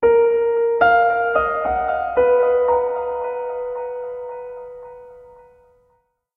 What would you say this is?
Small phrase ending with question mark, part of Piano moods pack.
calm, mellow, reverb, phrase, piano, mood